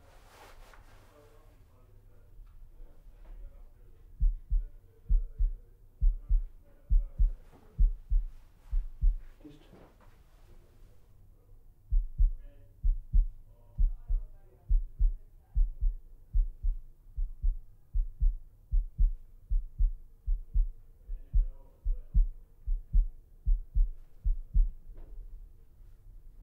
BLODIGT AT19 1
I created this sound by mistake actually. I just started to beat the boom with my fingers during a break of a film recording. I thought it sounded somewhat of a heartbeat. Made with a semi-long fiberglass boom, MKH60 and a SoundDevices 744T HD recorder. There is quite a lot of low freaquences in this sample, so poor headphones do not make this sample justice.
heartbeat, experimental-heartbeat